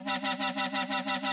Speed pad sound loop